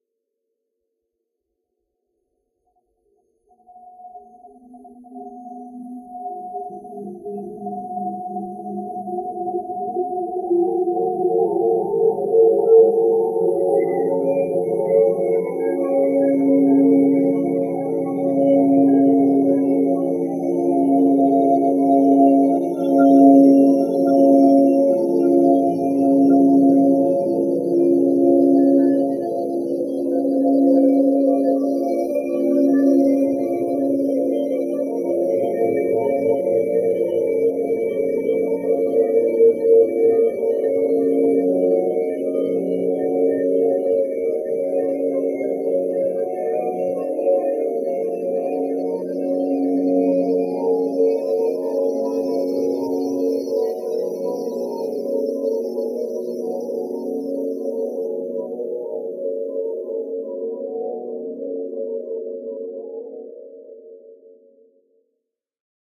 ROM RAM REM -- A computer's First Dream
ai, ambient, artificial-intelligence, computer, electric-guitar, film, game, human-vox, morphing, texture, virtual-reality